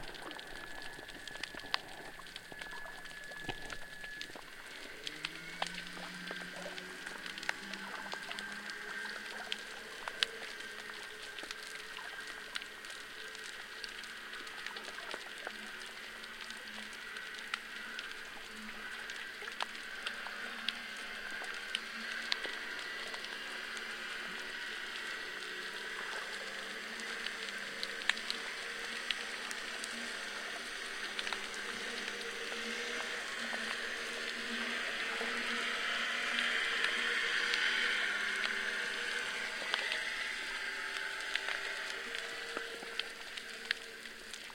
140816 Brela HarborSub2
Stereo hydrophone recording of a small Croatian harbor basin.
Hydrophones submerged by approx. 1m in the center of the basin, by the bounding wall. A motorboat leaving the harbor can be heard in the midrange.
Recorded with a pair of JrF Series D hydrophones, spaced 18 cm apart with 0° dispersion.
boat, bubble, field-recording, harbor, hydrophone, marine, motor, plink, submerged, transients, underwater, water